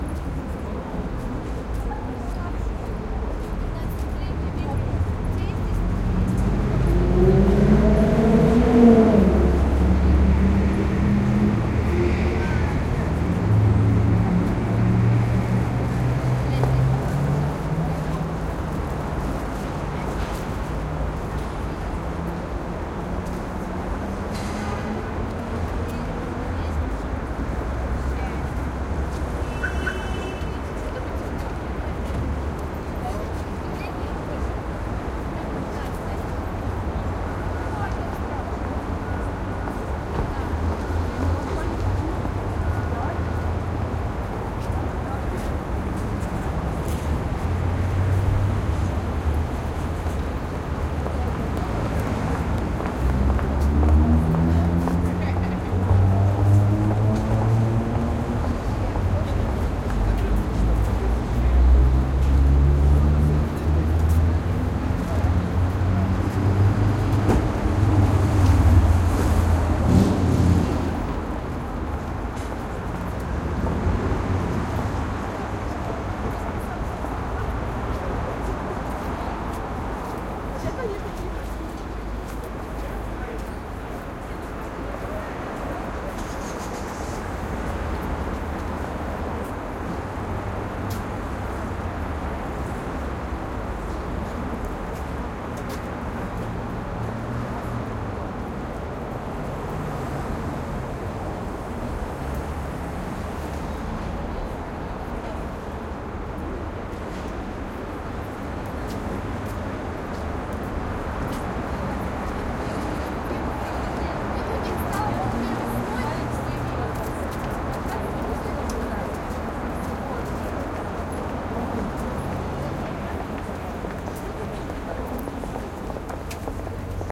Moscow, summer. Traffic on Prospect Mira, near subway entrance, pedestrians entering subway station. Mic facing subway entrance.
Nevaton MK47 and Sound Devices 744t.